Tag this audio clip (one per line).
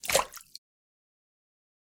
wave
aqua
Run
marine
pouring
Running
crash
Sea
Slap
bloop
blop
Drip
Water
aquatic
Wet
Lake
Dripping
pour
Movie
River
Game
Splash